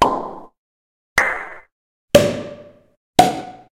corkscrew mix
uncorking several bottles of wine
bottle, opening